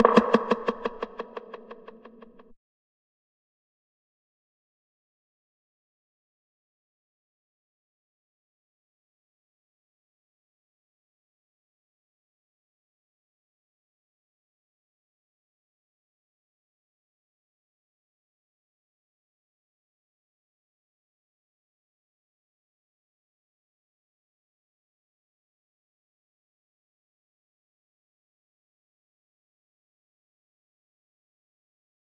Space echo 2
percussive sound put through a Roland Space Echo
fx, delay, hit